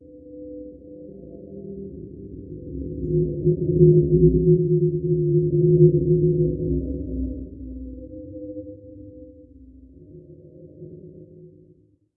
Specific apocalyptic sound